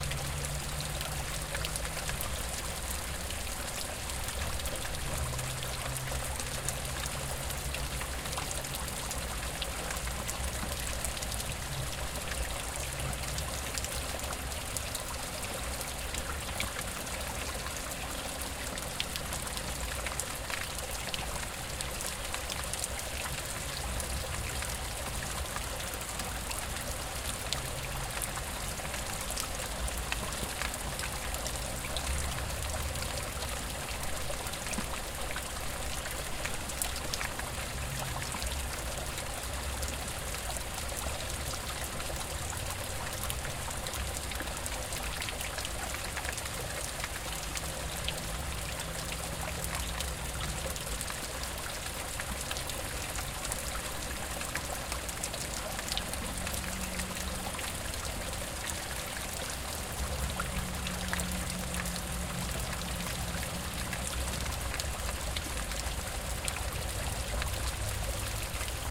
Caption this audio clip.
Waterfall from wastewater pipe on the riverside near Leningradsky bridge.
Recorded 2012-10-13.
XT-stereo
city, drain, flow, noise, pipe, sewage, sewer, tube, wastewater, water, waterfall, water-flow, water-pipe